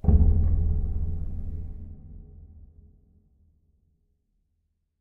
Deep Metal Hit 2
A deep and dark metallic hit.
This is a recording of hitting a metallic heater with a wooden mallet. It was then run through EQ to boost the low frequencies and a lot of reverb was added to make it more atmospheric.
Suitable for e.g. horror films and games.
Microphone: Beyerdynamic MCE 530
Post-Production: EQ, Reverb
bang, cinematic, creepy, dark, deep, hit, horror, scary, spooky, suspense